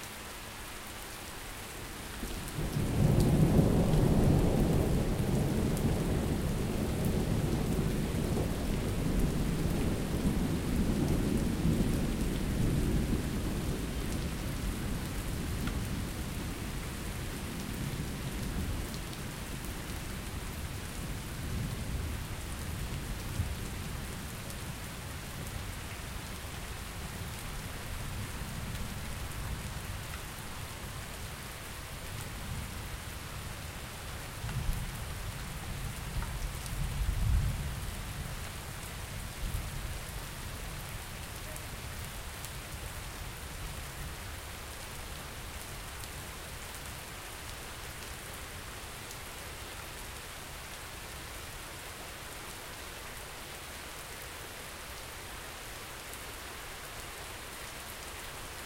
Rolling thunder.These tracks were recorded in a bungalow park with an USB mono microphone (Samson C01U). Only 44.1 - 16 sorry to say and mono.
rain, reverberations, rolling, thunder